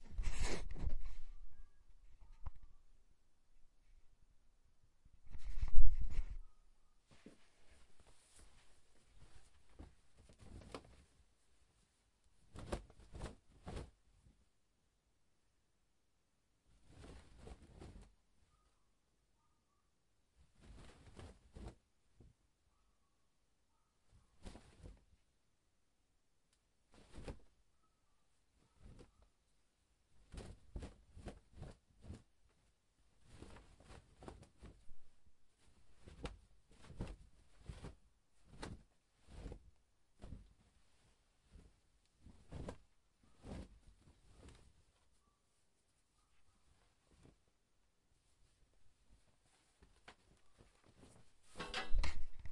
Towel Flutter
Towel being shaken. Flutter sound